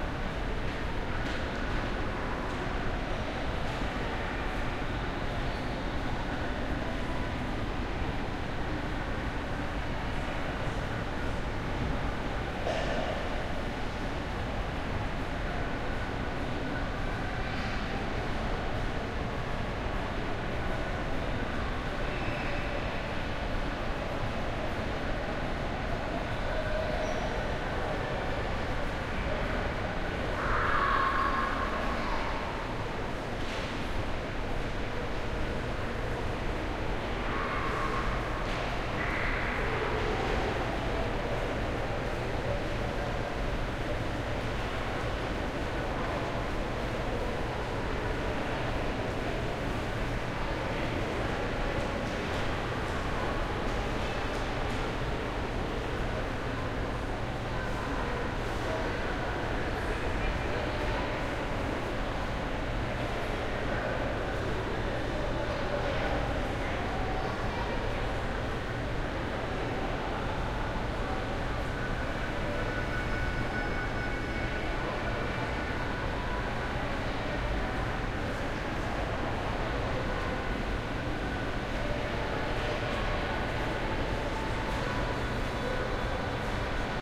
Mall, Quiet Echoes
Muffled sounds of movement and chatter echo back into a far corner of a large shopping center.